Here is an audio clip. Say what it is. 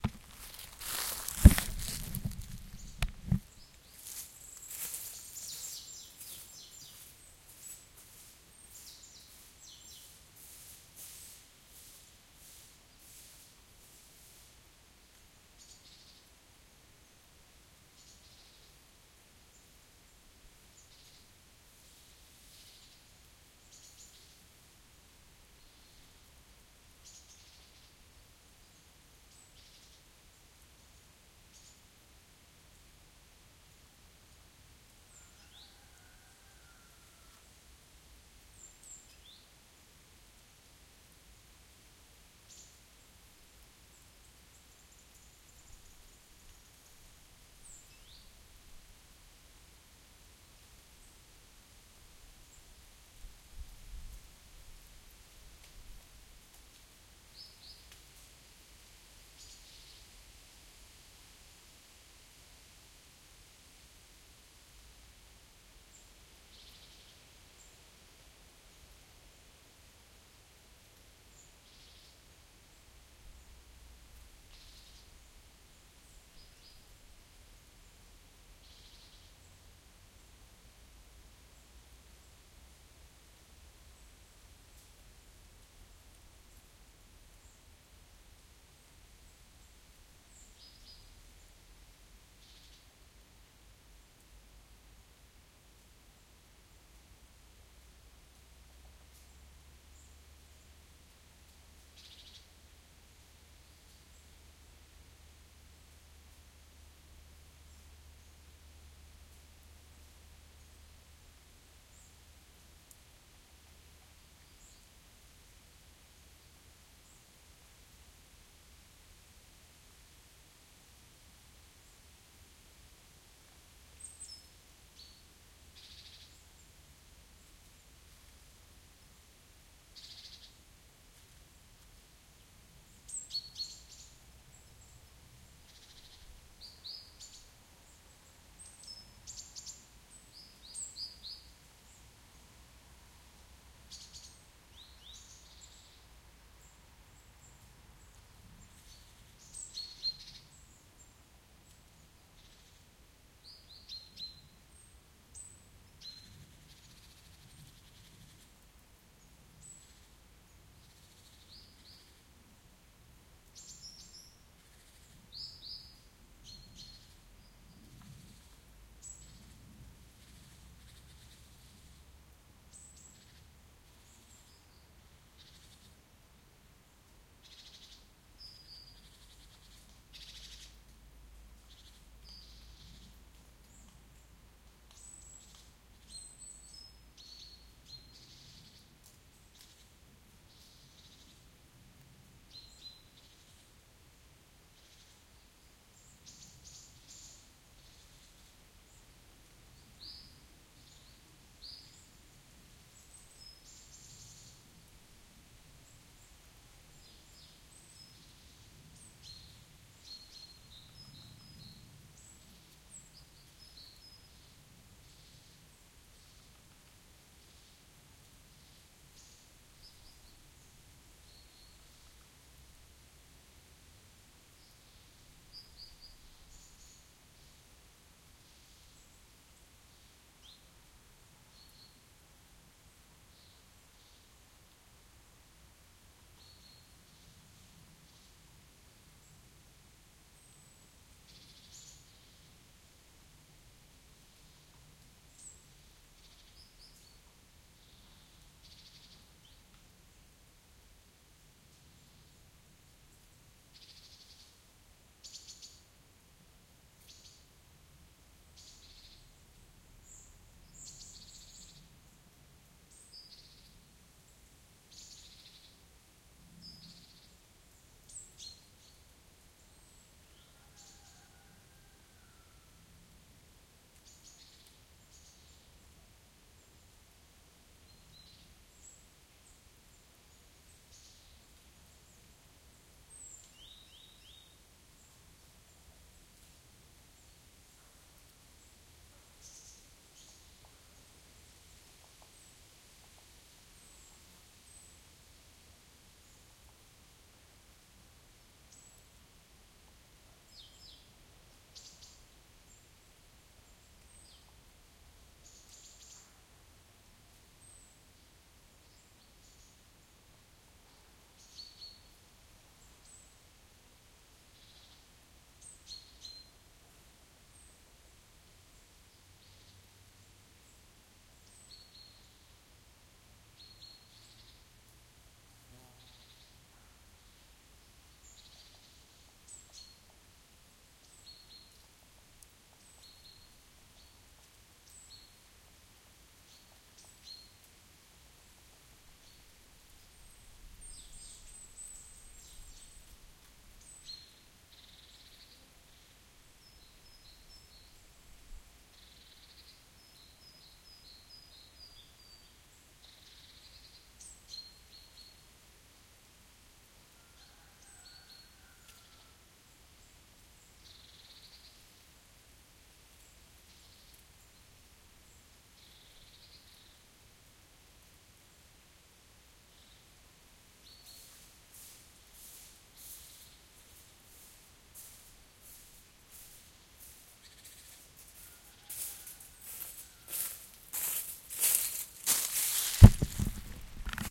birds singing in the autumn forest - rear
ambience, nature, birdsong